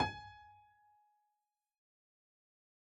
g# octave 6